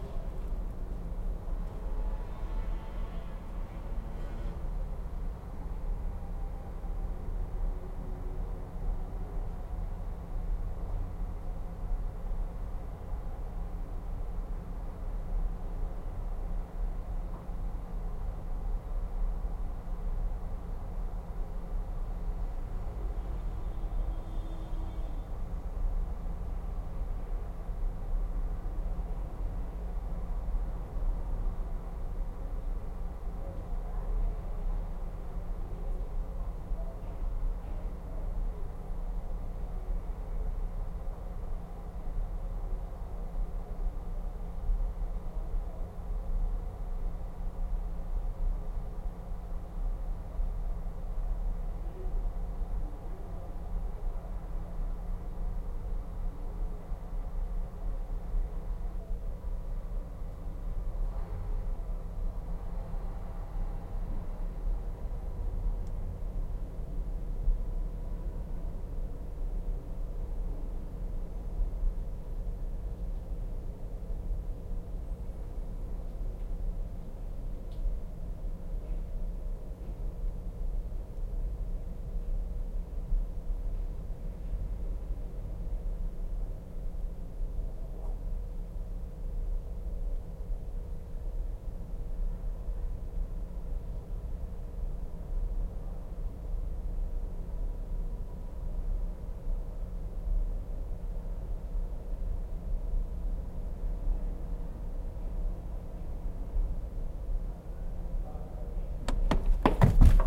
Empty (large) Room - Tone | Stereo MS
24, ambience, atmos, background, bit, decoded, empty, inside, large-room, ms, room, room-noise, room-tone, roomtone, stereo, tone